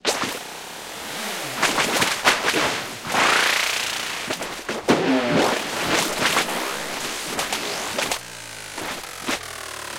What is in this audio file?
twistedwooshes-plastic4
Abstract wooshes made from sound of stepping onto plastic bottle. Heavily processed in HourGlass.
abstract, crazy, FX, glitch, granular, insane, mind-bend, moving, plastic, processed, time-strech, twisted, whoosh